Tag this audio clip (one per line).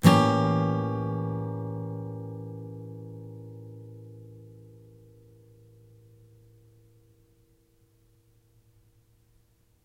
acoustic
guitar
strummed
chord